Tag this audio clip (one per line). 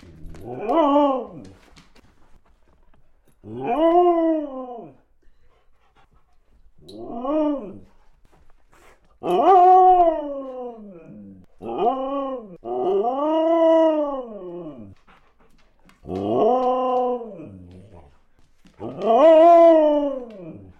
husky
singing
dog
wolf
howl